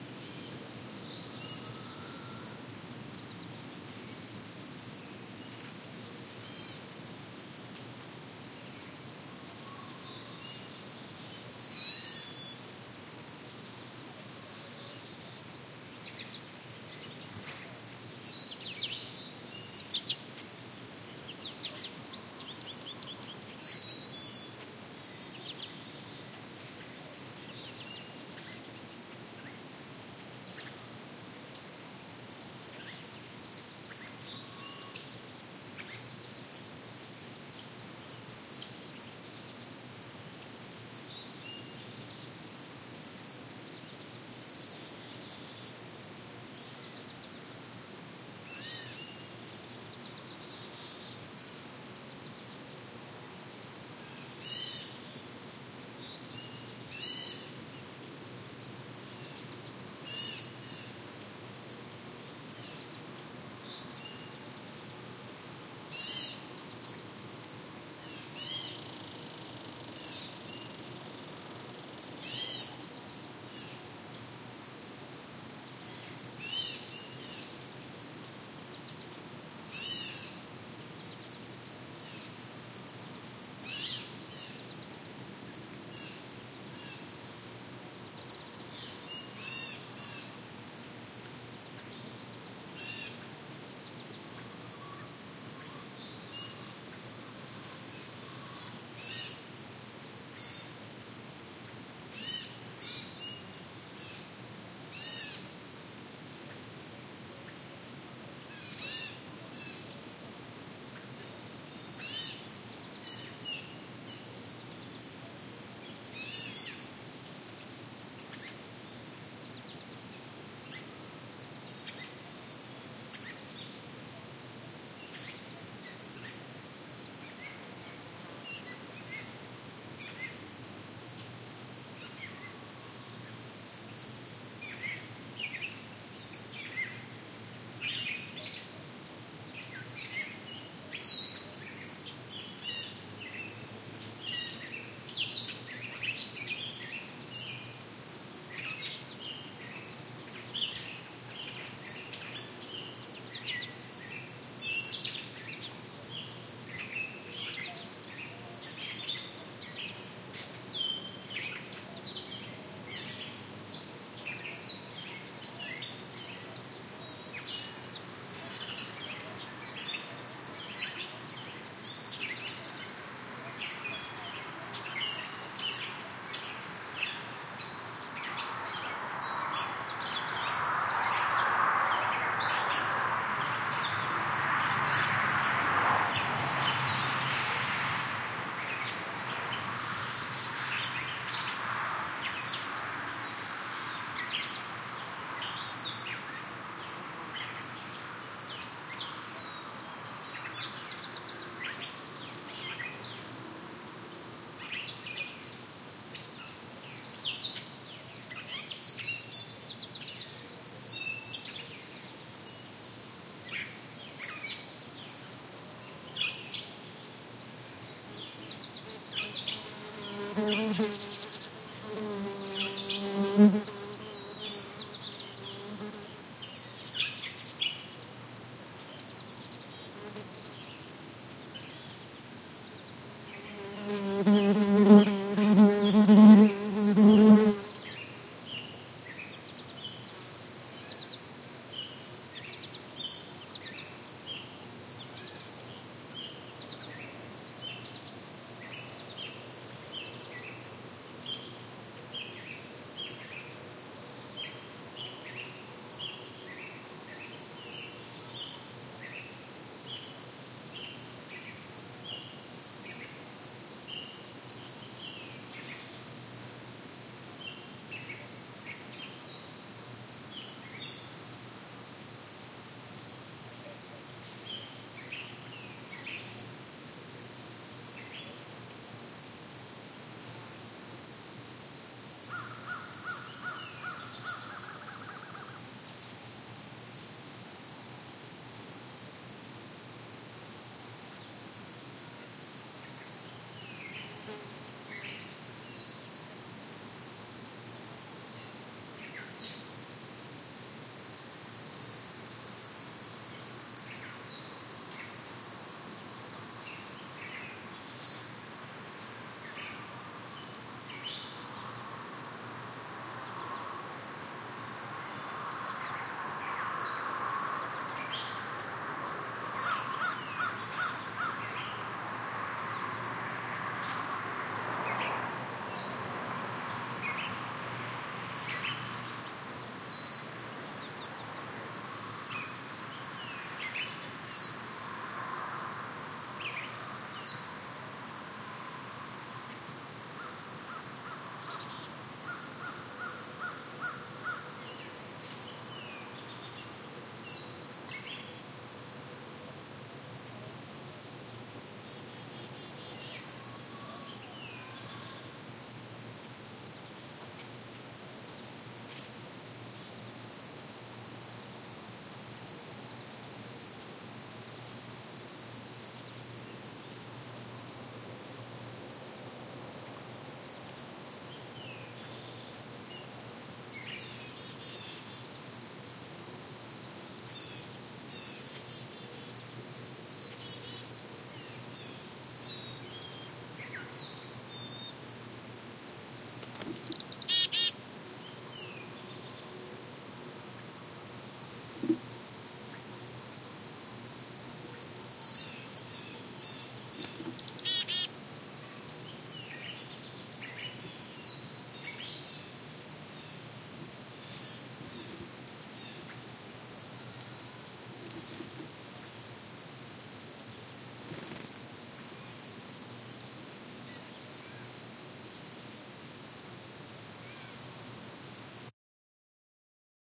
Summer on the Finger Lakes Trail, Upstate New York, 2020
Taken at 8AM on a hot and sunny summer morning. Recorder (Tascam DR 40) was placed in a tree at the edge of a field.
birds, buzzing, countryside, farm, field, field-recording, insects, nature, rural